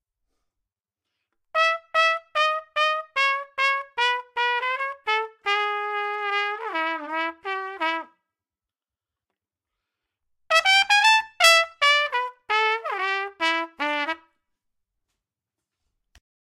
Jazzy trumpet lick over chords E and B, just me mucking around using a B-1 condenser at home. No effects have been added after recording.
i can i do play samples in 'to order' and take great pleasure in hearing what other people do with these samples...
lick, jazzy, trumpet